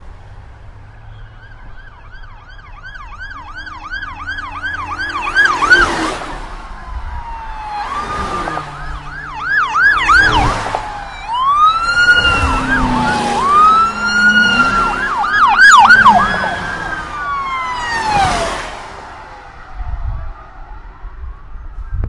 8 police cars passing w sirens
Caught these emergency vehicles racing past on my zoom H1.
AMBULANCE, AMERICAN, CLOSE, EMERGENCY, ENGINES, FIELD-RECORDING, LOUD, PASSING, POLICE, RACING, SIRENS, VEHICLES, ZOOM-H1